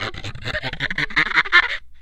happy.monkey.03
friction, idiophone, daxophone, wood, instrument